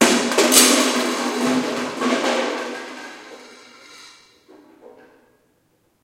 Kicking empty paint can 2
Exactly as described. Kicking a paint can.
can, paint